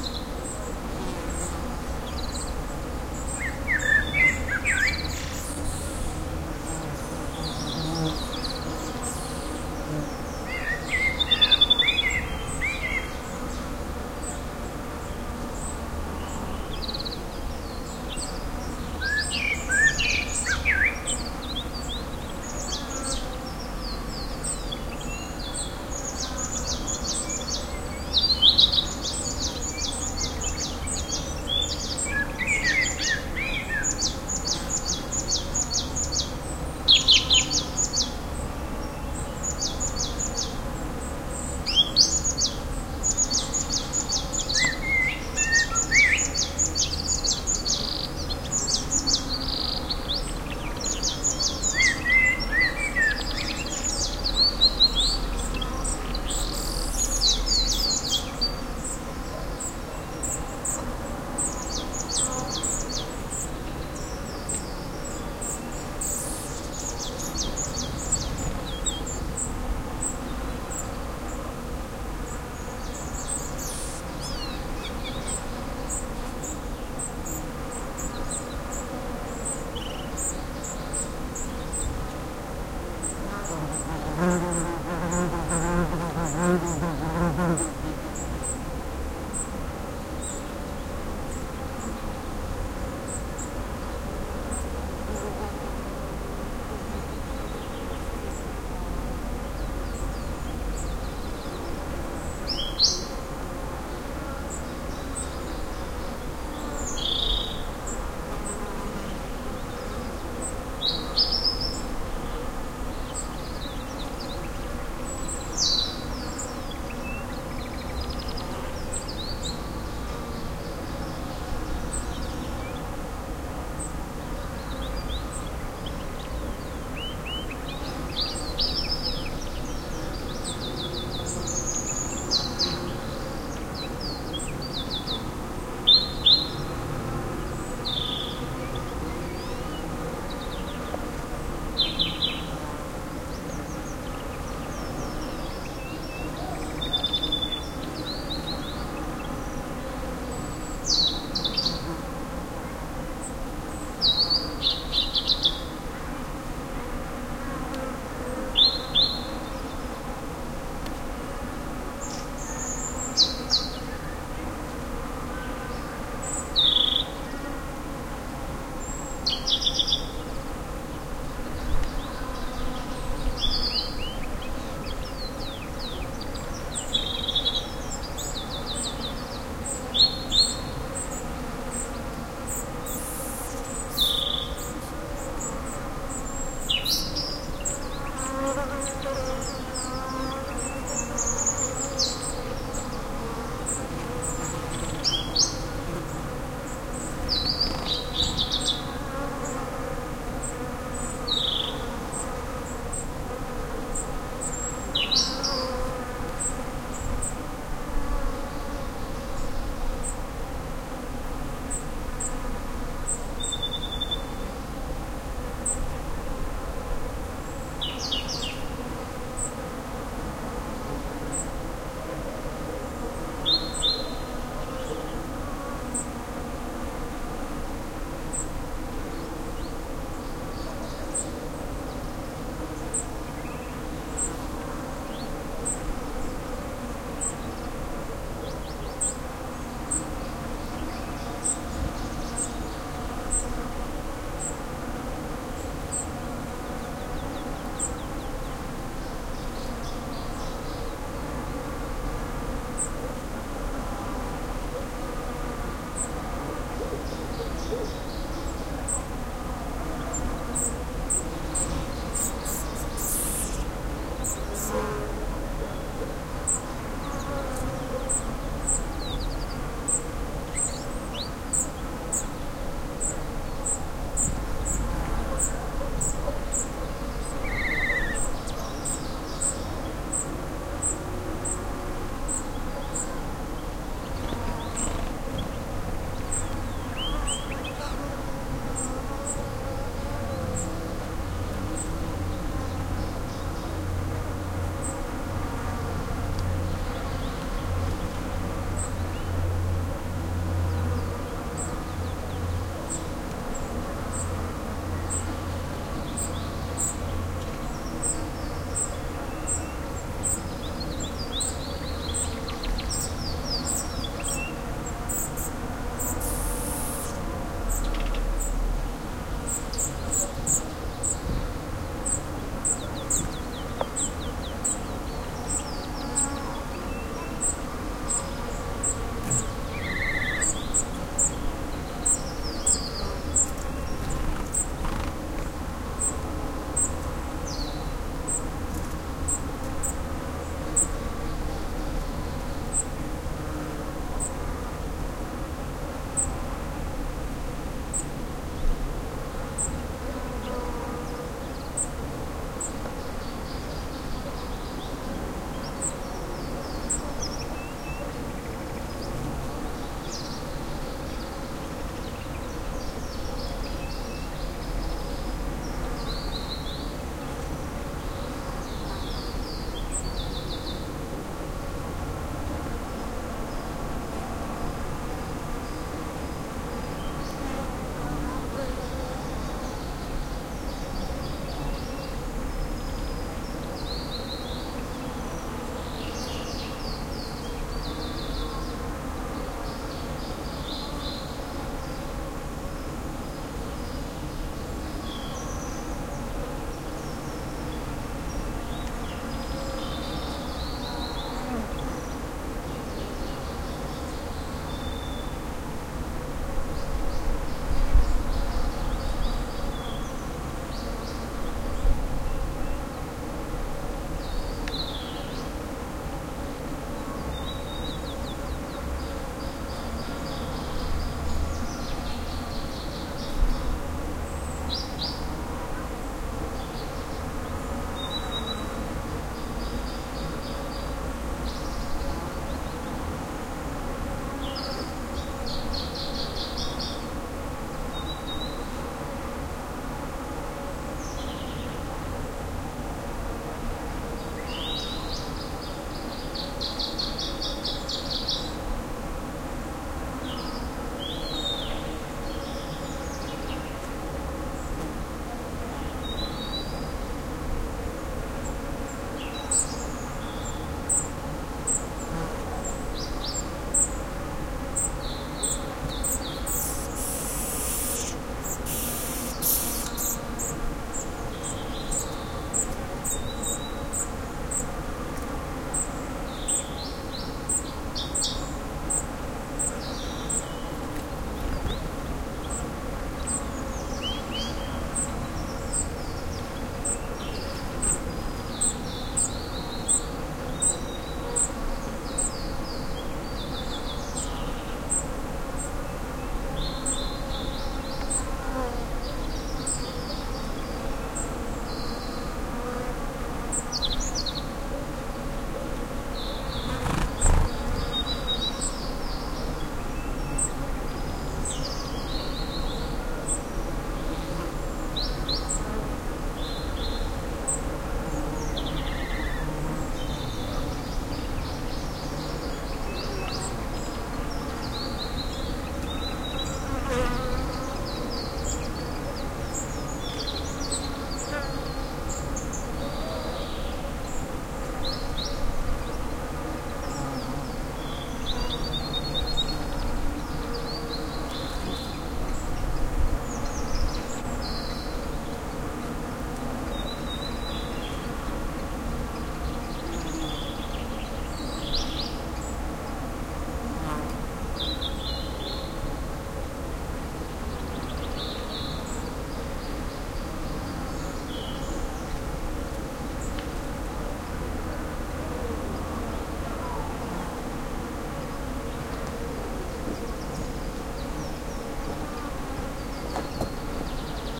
20110505 pinewood.ambiance
long take of spring atmosphere with lots of birds and buzzing bees. Recorded on Canary Pine forest at the Roque Nublo mountain, Gran Canaria. PCM M10 internal mics, placed below a large bush of Chamaecytisus proliferus crowded with bees